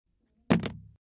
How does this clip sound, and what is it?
sound of a cell phone falling
cellphone, sound, uam, celular, 4maudio17